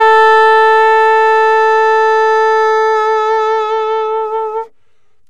Alto Sax a3 v95
The first of a series of saxophone samples. The format is ready to use in sampletank but obviously can be imported to other samplers. I called it "free jazz" because some notes are out of tune and edgy in contrast to the others. The collection includes multiple articulations for a realistic performance.
alto-sax, jazz, sampled-instruments, sax, saxophone, vst, woodwind